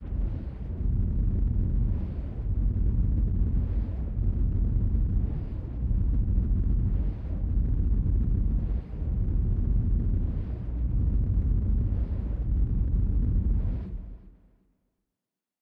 Dark Rumbling
I accidentally played low notes while making a synth pad and it sounded terrifying. Please take it away from me.
bass, dark, horror, low, ominous, rumble, scary, synth